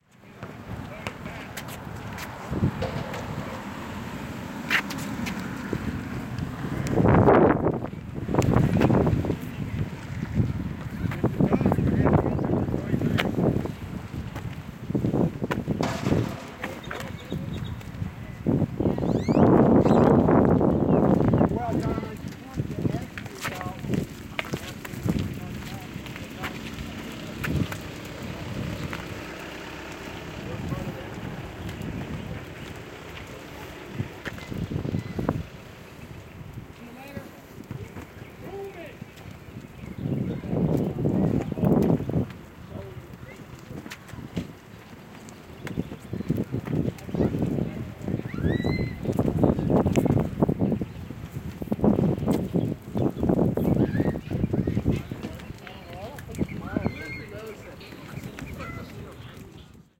Sound Walk - Dick Nichols Park
Sound walk for Kadenze course Introduction to Sound and Acoustic Sketching. This particular walk was recorded at Dick Nichols Park in South Austin, Texas. Highlights include basketball playing, cars driving, birds chirping, some walking sound, and kids playing.
car, park, walking, shouting, kids, playground, sound-walk, playing, chirping, birds, children, kadenze, basketball